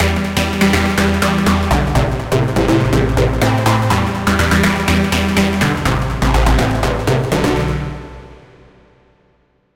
sydance9 123bpm
Made with vst instruments.
club, dance, disco, drop, instrumental, intro, jingle, mix, move, podcast, sample, techno, trailer